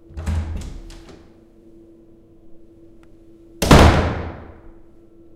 Puerta cerrada y abierta
Sonido de una puerta al abrirse y cerrarse.
Grabado con una grabadora H4.
golpe, puerta